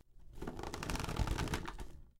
25. cama rechinando Bed creaking
Big bed creaking
Bed, creak, old